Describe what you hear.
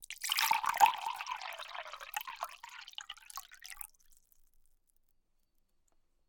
Water running, or being poured into a mug
aqua, bottle, filling, fluid, Liquid, pour, pouring, splash, stream, trickle, water